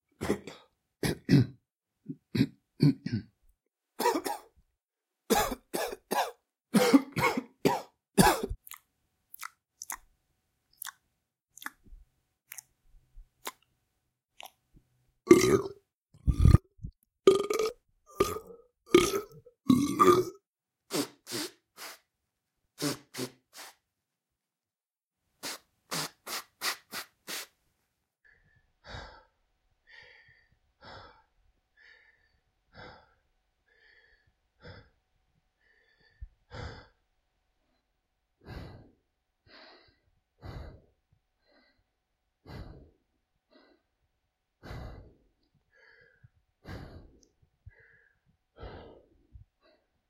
throat, mouth and nasal sounds
Collection of various common sounds that humans make with mouth and nose (coughing, burping, breathing, ...), processed (selection, noise removal, ...) to be immediately usable.
coughing, mouth, belch